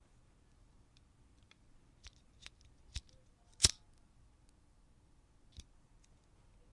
Recording of a lighter being flickered on.
fire, lighter, flicker